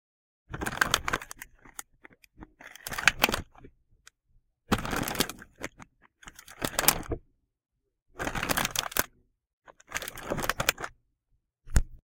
Store Crinkling Bag3
checkout, can, store, produce, cooling